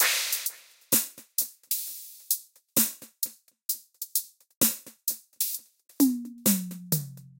Dub DrumVerse 130bpm
drum, dub, loop